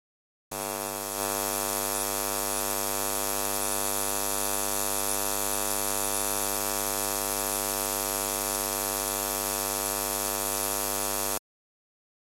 PAN ElFy SFX Buzz 12
electricity, buzz, sfx, spark, electric